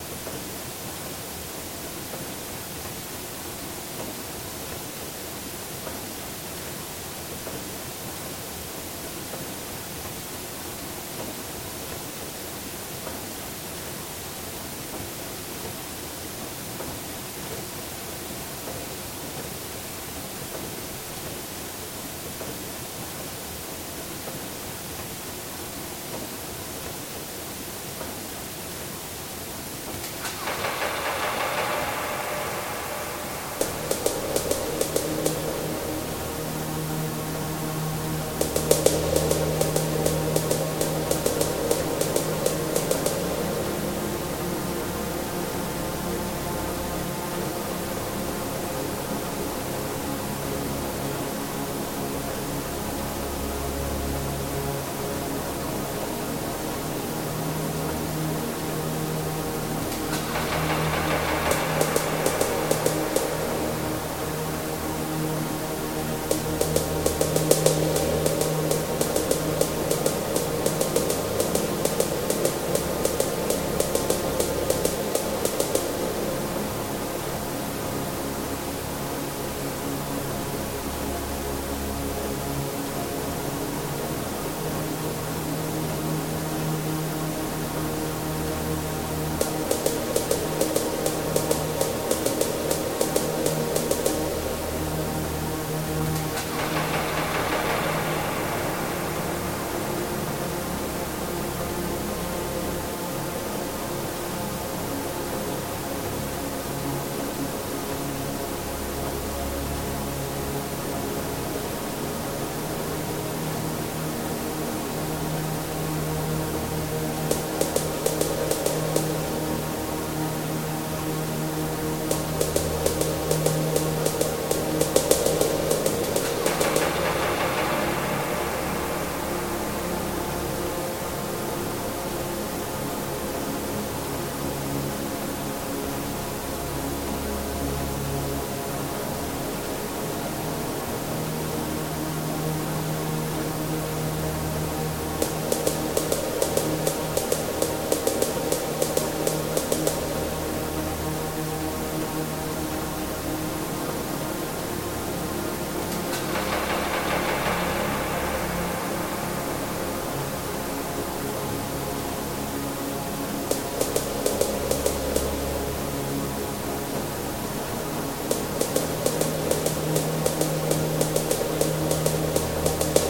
the dam in world war 2
i did this on keyboard the tune and the beat and the the dam effect by ghengis attenborough on free sound hope u like it :D